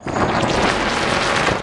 Some of the glitch / ambient sounds that I've created.
reaktor, idm, glitch, electronic